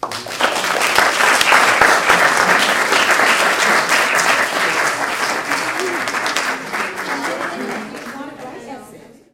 A group of about 40 people in a classroom clapping. The room itself is probably about 20 feet square with a high ceiling.